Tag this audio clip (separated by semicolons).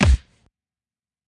bass-drum; kick; kick-drum; drop; impact; land; hit; big; thud; low; punch; hitting; boom; object; thump; bass; kickdrum; fat; metal; dud; tap; slap; boosh; fall; bang